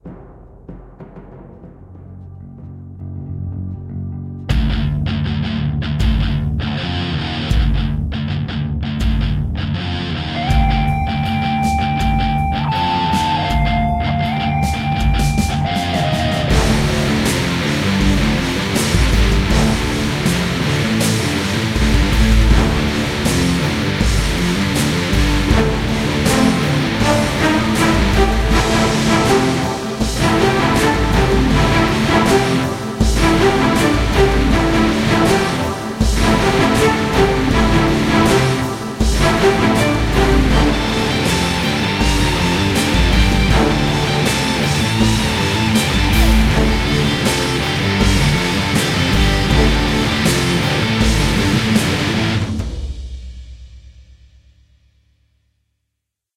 A powerful orchestral metal song.